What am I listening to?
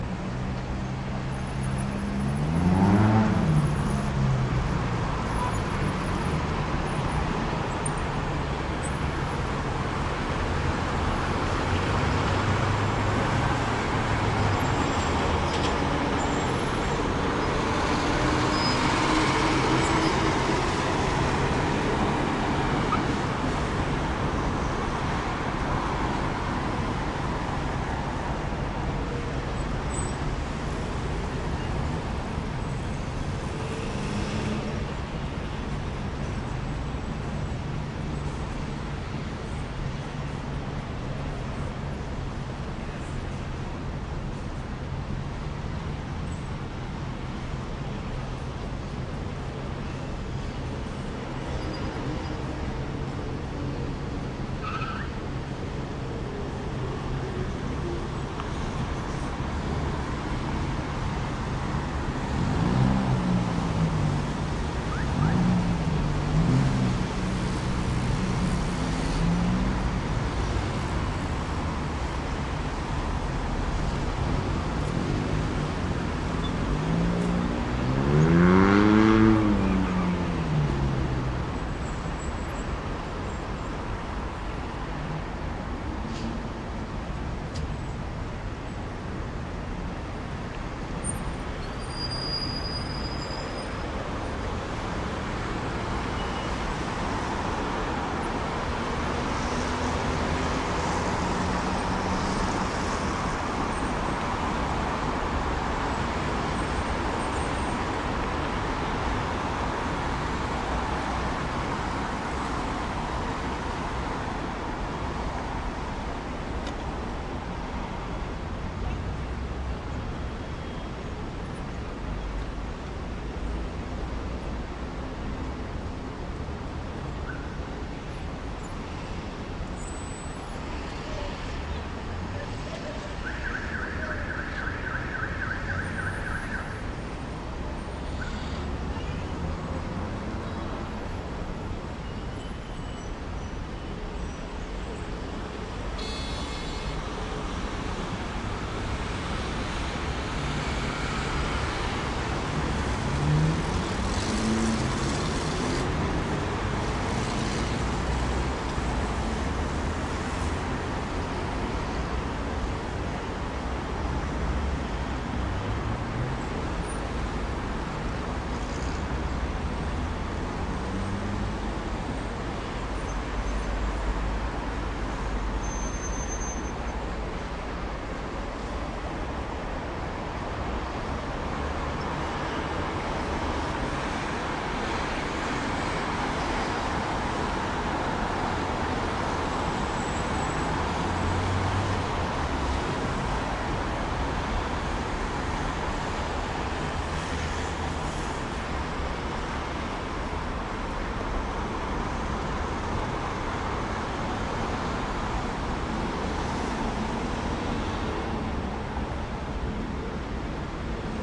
20120726 08pm JukovaStr
Crossroad on Jukova - Dumskaya streets at 08pm. Noise of cars. Omsk, Russia. Recorded 26.07.2012 from 6 floor.
Russia, Omsk, city, trafficlight, crossroad, cars, street, noise, traffic